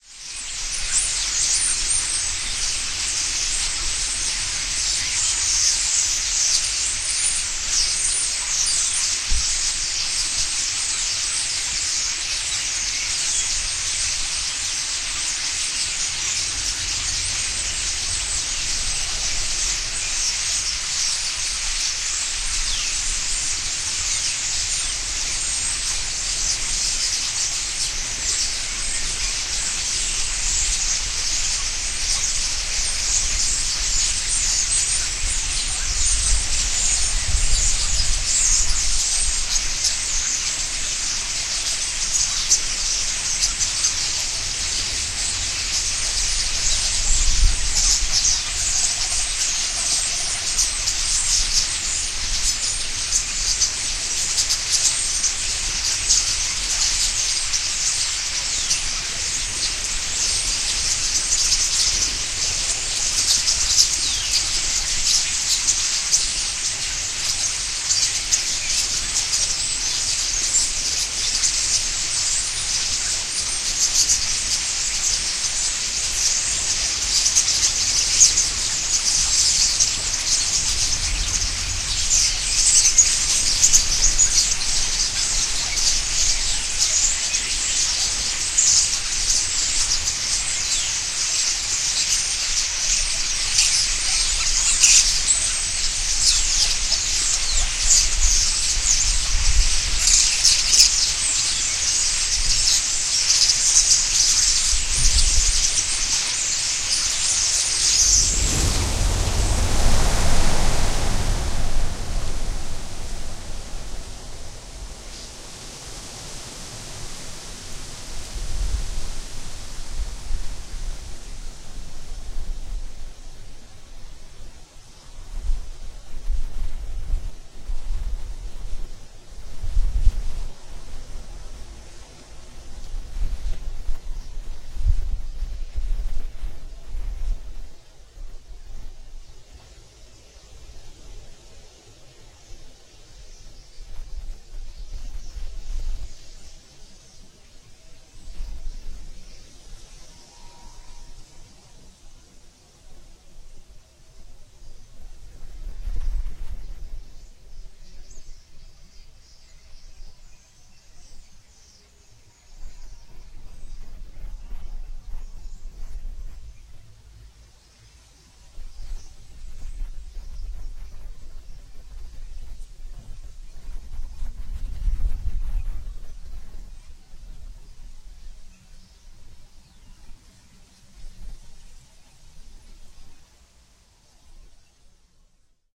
Birds taking of
This is a recording of really big flock of birds, taking a shower on the ground, in my driveway. I decided to see if i was lucky enough to get the sound of them, without disturbing them. I was in luck alright, and i got a real nice sound of their bathing, piping, and later their take-off, as a cat came too close. I would say, there was about 500 - 700 birds, so it gets nice and loud when they fly away.
This was recorded with a TSM PR1 portable digital recorder, with external stereo microphones. Edited in Audacity 1.3.5-beta on ubuntu 8.04.2 linux.
birds, loud, eating, birdbath, take-off, birdflock, birdnoise